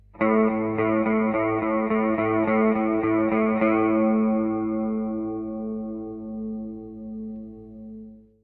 One note electric guitar
I just played a B.
Electric, Guitar, One, note